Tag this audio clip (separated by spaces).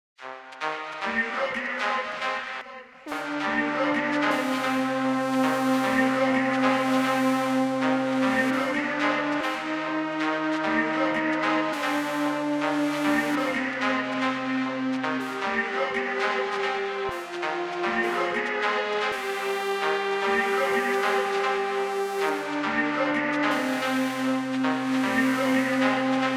electro loop triphop trip hop voice